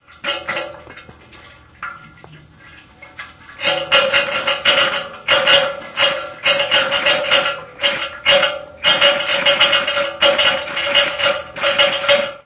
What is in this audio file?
Close-up recording of radiator clanging and sputtering water.